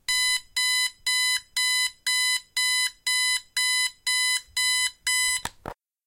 This is my alarm clock going off. Sure to wake you up!